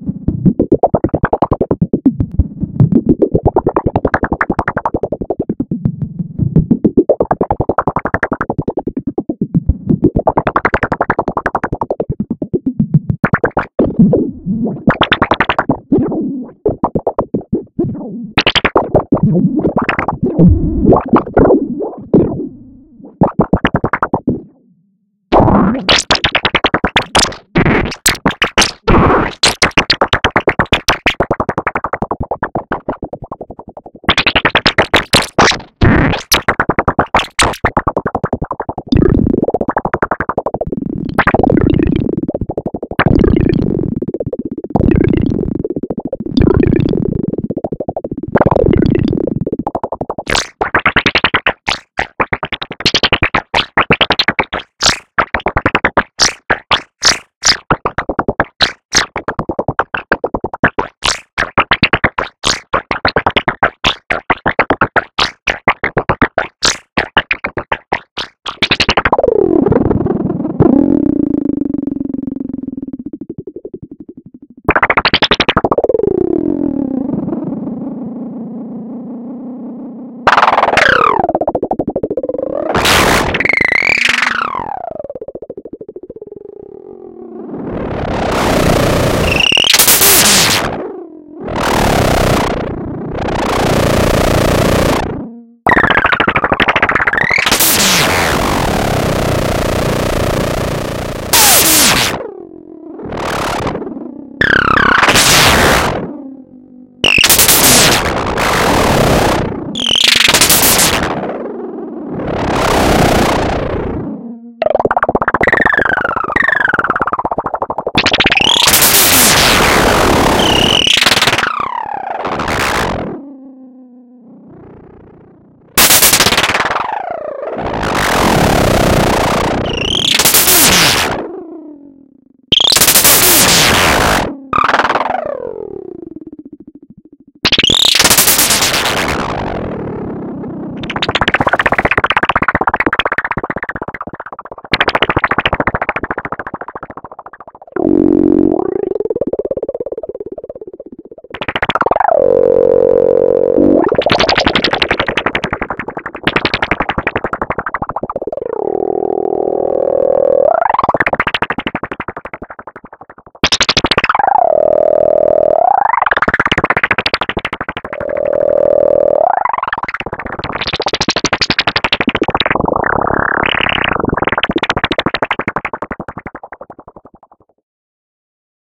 Warped Warblard
Someone left the space faucet on, thus anti-gravity droplets.